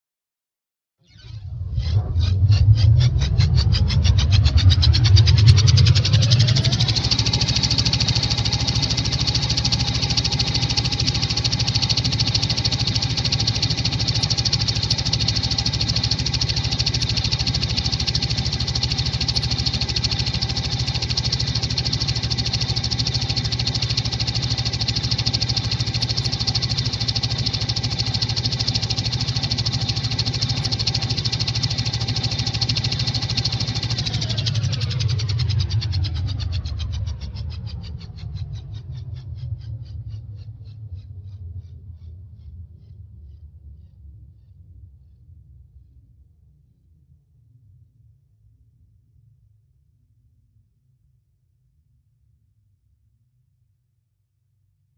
Roto Chopper
More sounds from my reaktor engine synth. This one is more of a heavy helicopter turbine starting up, running, and then cycling down.